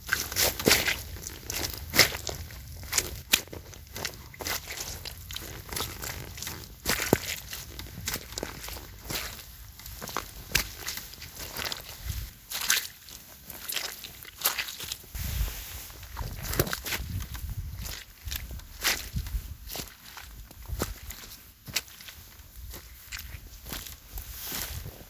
Some footsteps through a very muddy and wet forest floor. Recorded with mobile phone.